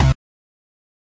Synth Bass 024
A collection of Samples, sampled from the Nord Lead.